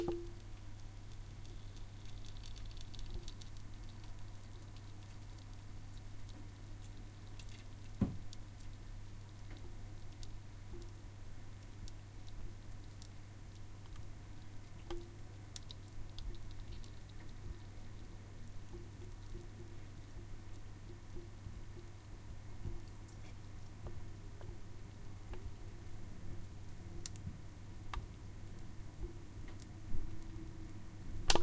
Slow Pan Frying
Short close up recoding of frying in a pan
chef, cook, cooking, eating, food, fry, frying, hot, kitchen, oil, pan, sizzle, sizzling, stove